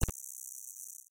Boom c2 kick dist
This is a bass kick I made with a nice crunchy toned delay. It started life as a mono bass kick off my 808 drum machine, I converted it to stereo then inverted the right channel, I distorted it and processed it with 100% envelope to really boost the front end punch. It's c2 pitch for convenience.
sub, punchy, boom, heavy, kick, hard, bass, hit, crunchy, beat